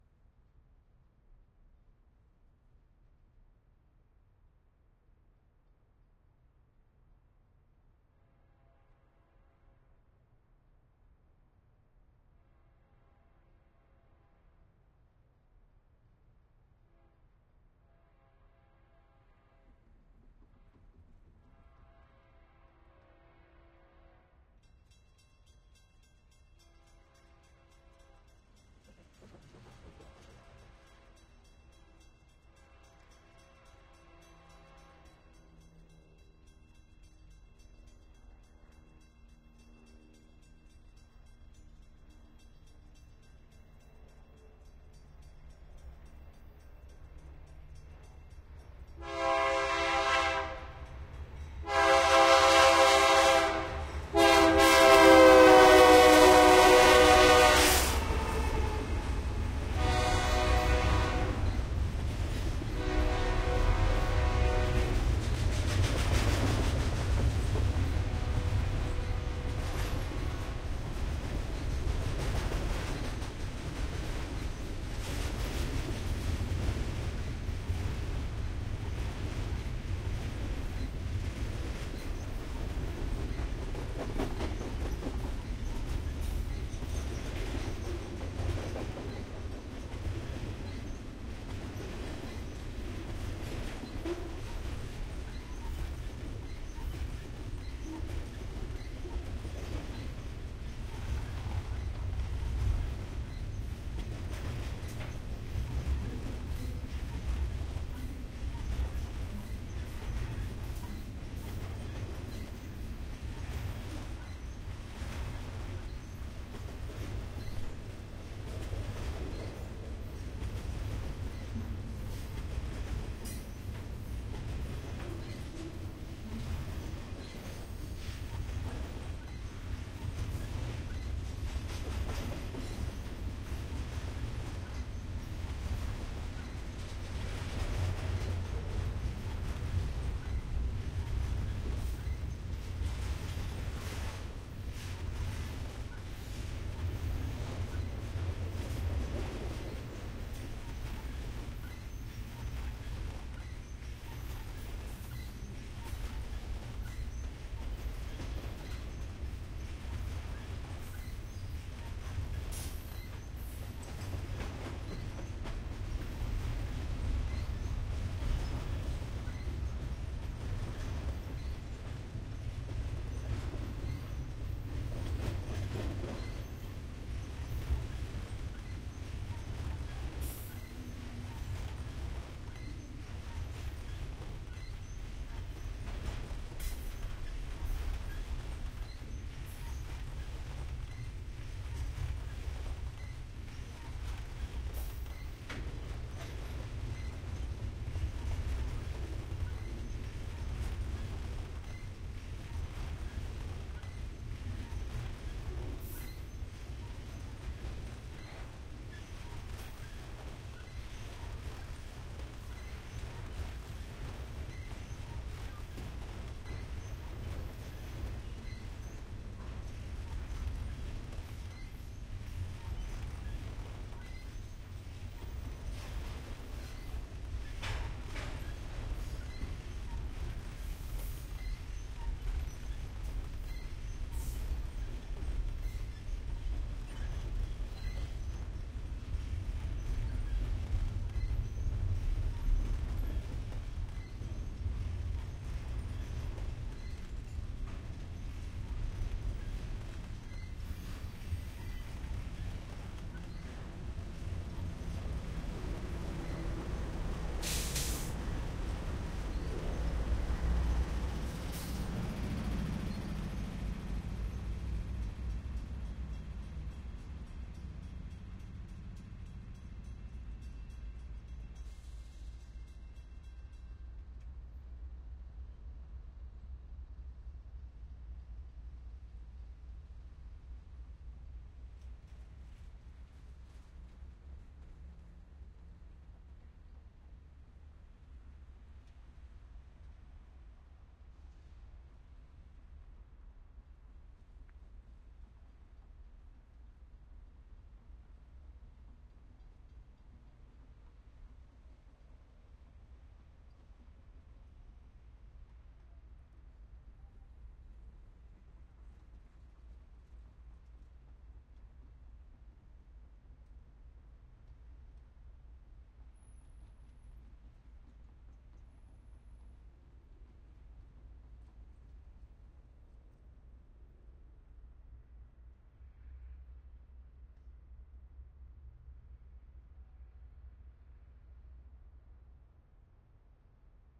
sample pack.
The three samples in this series were recorded simultaneously (from
approximately the same position) with three different standard stereo
microphone arrangements: mid-side (mixed into L-R), X-Y cardioid, and
with a Jecklin disk.
The 5'34" recordings capture a long freight train (with a helicopter
flying overhead) passing approximately 10 feet in front of the
microphones (from left to right) in Berkeley, California (USA) on
September 17, 2006.
This recording was made with a pair of Sennheiser MKH-800
microphones in a mid-side configuration (inside a Rycote blimp).
The "mid" microphone was set to "wide cardioid" and the "side"
was set to "figure-8", with the array connected to a Sound Devices 744T
Mixed into conventional A-B stereo in Logic Pro.
airhorn, diesel, field-recording, freight, helicopter, horn, locomotive, mid-side, m-s, ms, railroad, sennheiser, train